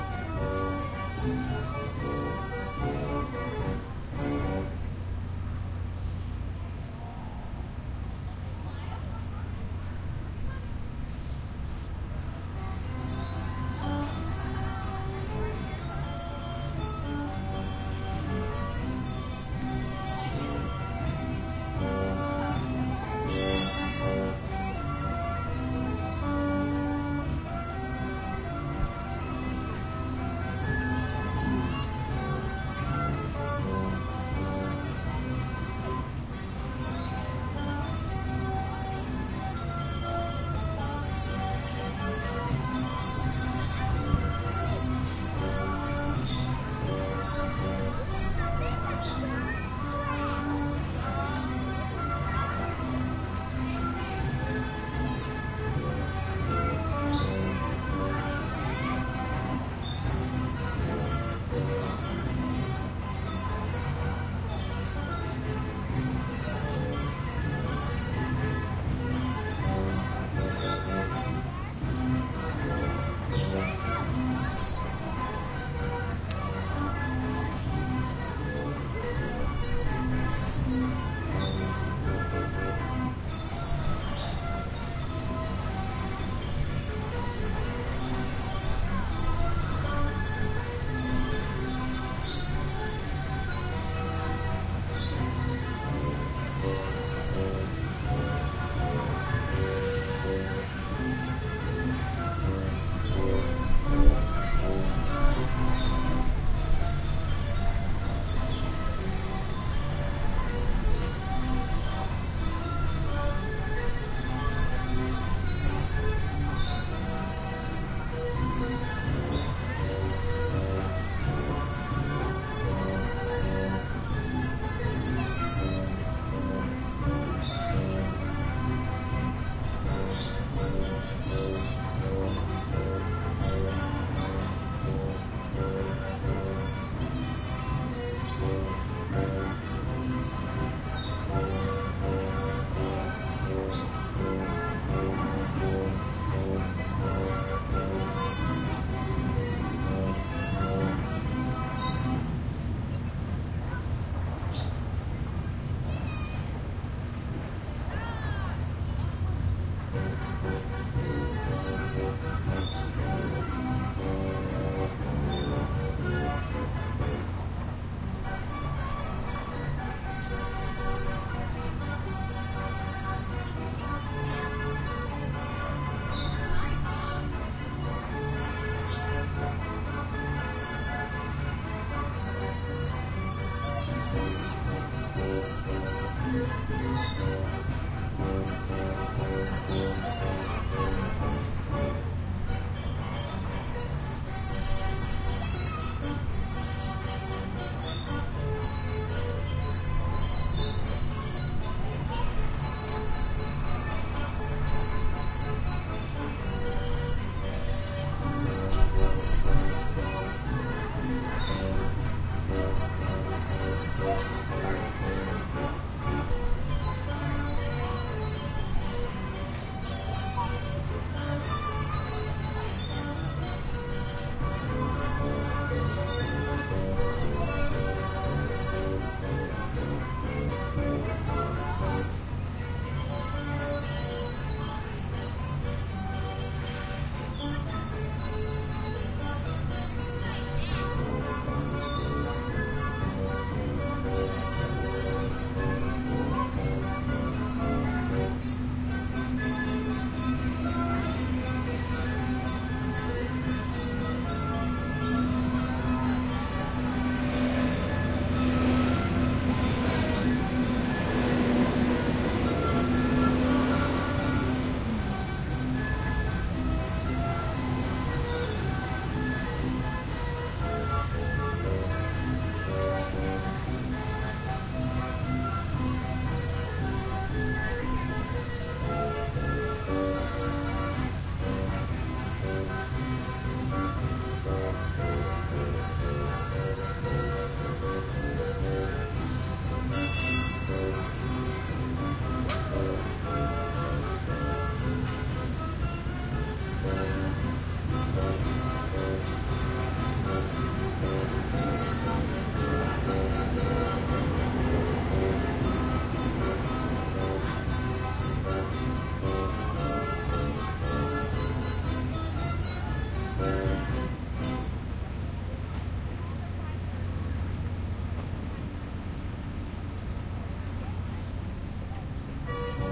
Recording of the carousel at the National Mall in DC.
carousel, merry-go-round, park, ride